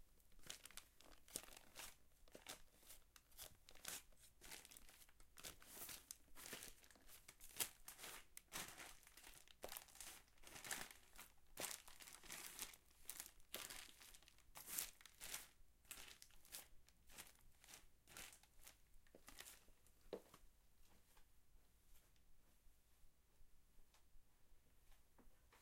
walk grass
Walking through dry grass and leaves
foot, grass, step, walking, ground, footsteps, feet, steps, walk